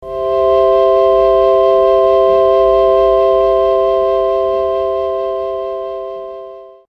bumbling around with the KC2
electric, kaossilator2, sound